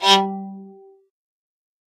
staccato G note on a terrible sounding unamplified electric violin. no reverb. noise processed out.
electric, instrument, short, violin